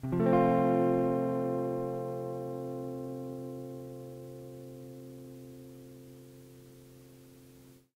Lo-fi tape samples at your disposal.